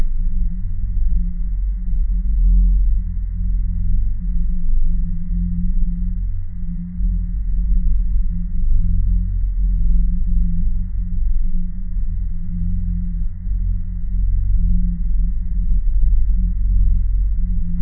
ambient spacecraft hum
An ominous ambient background hum that is heavy on the bass and with other layers to add subtle complexity. Loopable. Good for a dramatic scene on a space ship to add tension. Created in Adobe Audition.
space, hum, alien, scifi, bassy, bass, ambiance, background, spaceship, ship, space-craft, ambient, sci-fi, loop, spacecraft, space-ship